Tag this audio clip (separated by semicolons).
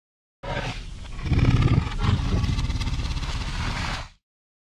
growl; scary; beast; creature; giant-monster; monster